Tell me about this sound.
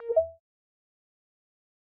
Sine Click 02
Part of a WIP library for inter-face sounds. I'm using softsynths and foley recordings.
button, interface, effect, sound, click